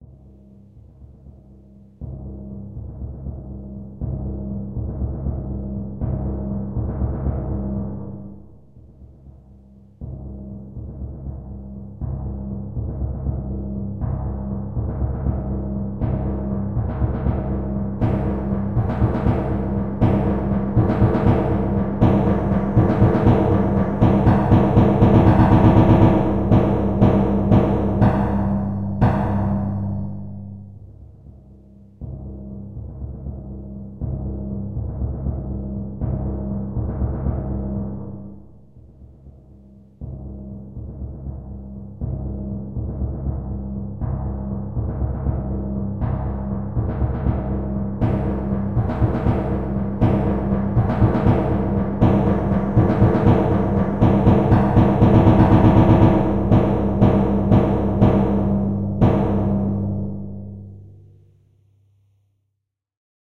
Futuristic High Tension Drums Only
Intended for use in association with a film's soundtrack to aid in the creation of a sense of tension for the scenes in which it is used.
Created using a music notation software called Musescore. Edited using Mixcraft 5.
Music-Beds, Synth, Tension